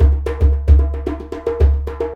djembe loop 13
Djembe loop (well loopable). Recorded with binaural mics + CoreSound 2496 + iRiver H140, from 1m distance.
you can support me by sending me some money:
funky, fast, percussion, djembe, drum, loopable, 110-bpm, loop, riff, african, hand, acoustic